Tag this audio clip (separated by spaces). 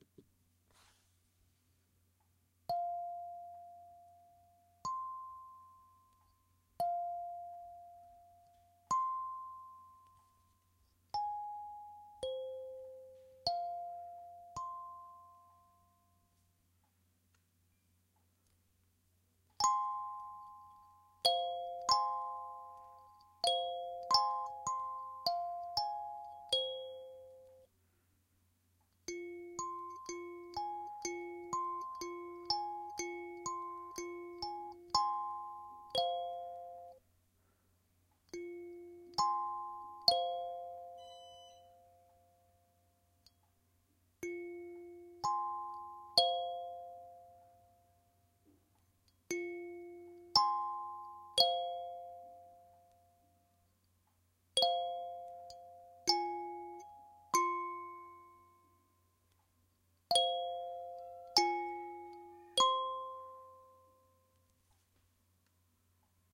thumb-piano plucked tone kalimba african musical-instruments